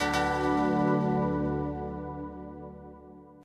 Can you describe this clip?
A short fanfare to play when a task is finished successfully. 3 of 3